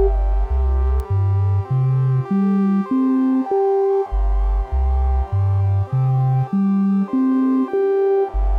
psc puredata synth